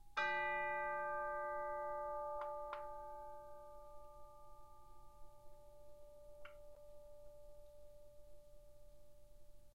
Instrument: Orchestral Chimes/Tubular Bells, Chromatic- C3-F4
Note: D, Octave 1
Volume: Pianissimo (pp)
RR Var: 1
Mic Setup: 6 SM-57's: 4 in Decca Tree (side-stereo pair-side), 2 close